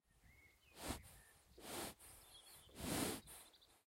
Horse sniffing. Recorded with a Tascam DR-40x